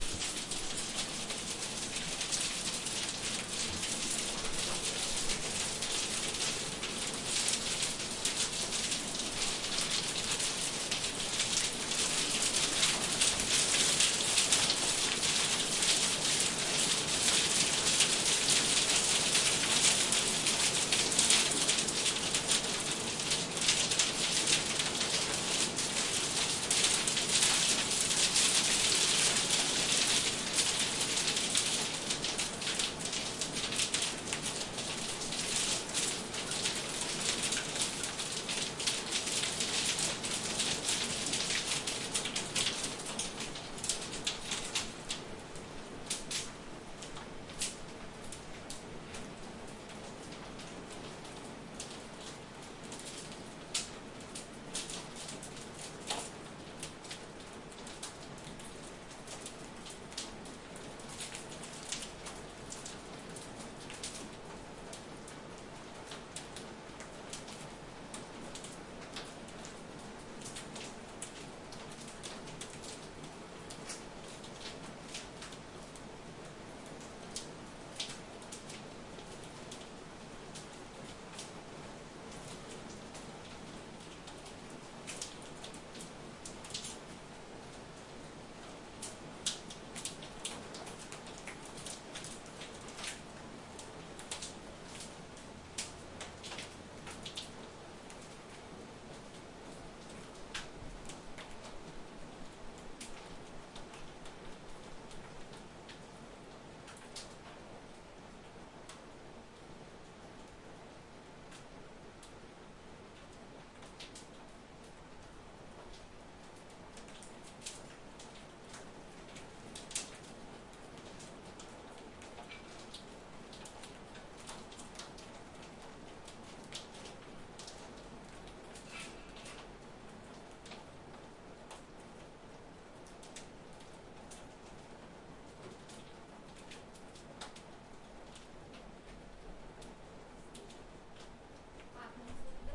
hailstones on windows 2018-05-18
hailstones 2018-05-18
recorded with Zoom H5
hailstones, rain, short